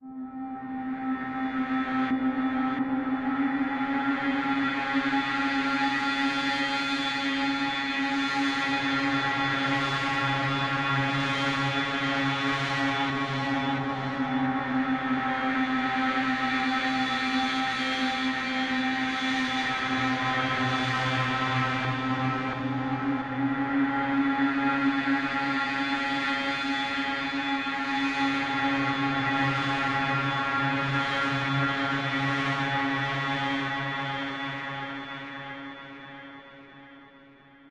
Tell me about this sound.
spooky dark pad
dark choir pad spooky
choir, dark, pad, spooky